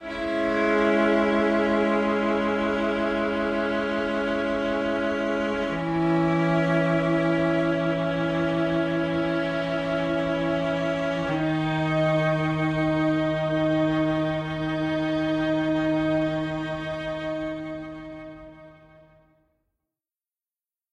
cellos three chords
Three cellos playing three melancholic (or perhaps a little scary) chords slowly.
Well, in reality it was one cello, but I tweaked it a little. And speaking of chords, the number of those might depend on other elements of arrangement. Unfortunately I haven't got a clue about what the bpm might be...
Anyway here it is. Clip it and use just one chord if you like, you're welcome.
music, slow